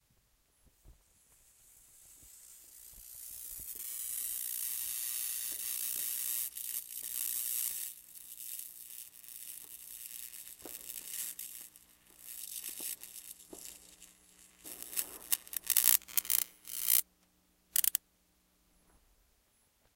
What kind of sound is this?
Bug Zapper Long moth electrocution
A bug zapper recording of a big moth getting fried. It was recorded on July 4 so you can hear the fire crackers in the background a little bit.